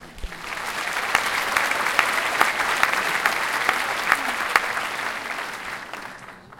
Audiance applauding in concert hall.
Field recording using Zoom H1 recorder.
Location: De Doelen theatre Rotterdam Netherlands
clap, crowd